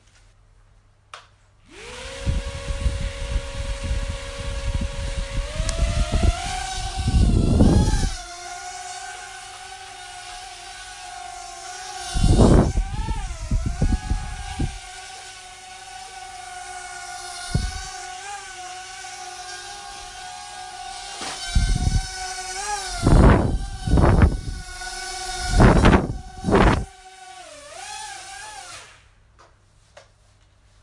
A sound of a small drone flying around indoors